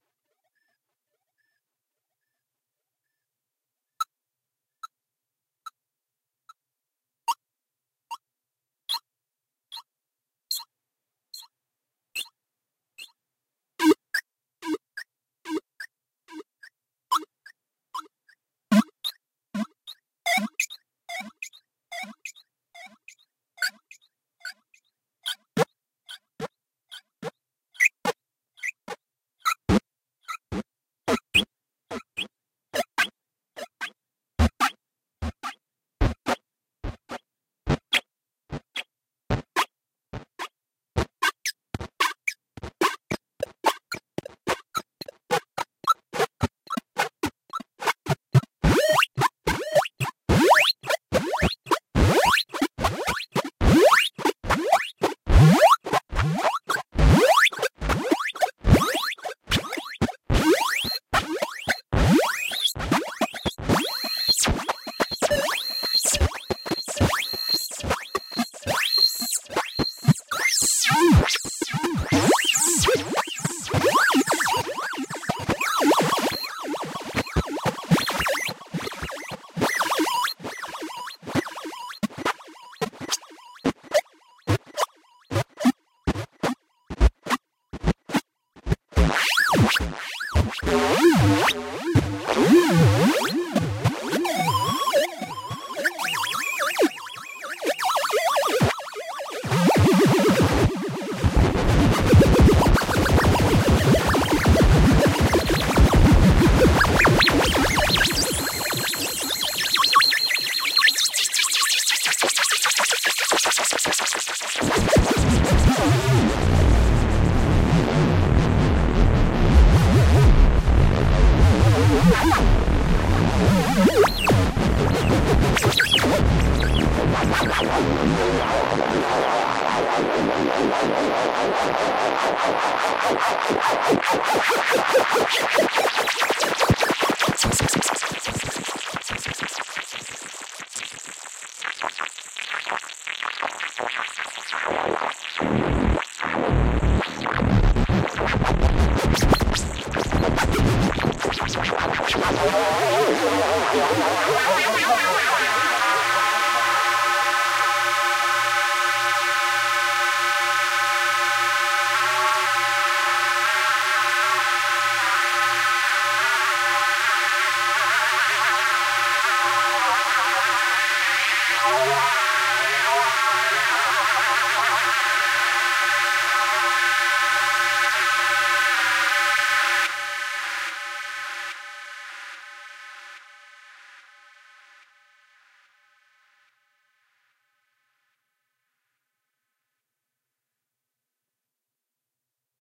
This is part of a series of experimental synthesized tracks I created using a Korg Kaoss Pad. Performed and recorded in a single, real-time situation and presented here with no added post-production.
The KAOSS PAD lets you control the effect entirely from the touch-pad in realtime. Different effect parameters are assigned to the X-axis and Y-axis of the touch-pad and can be controlled simultaneously, meaning that you can vary the delay time and the feedback at the same time, or simultaneously change the cutoff and resonance of a filter. This means that complex effect operations that otherwise would require two hands on a conventional knob-based controller can be performed easily and intuitively with just one hand. It’s also easy to apply complex effects by rubbing or tapping the pad with your fingertip as though you were playing a musical instrument.
electronica, kaoss-pad, synth